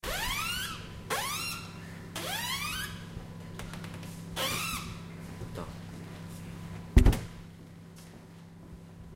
session 3 LBFR Mardoché & Melvin [10]
Here are the recordings after a hunting sounds made in all the school. Trying to find the source of the sound, the place where it was recorded...
sonicsnaps, france, rennes, labinquenais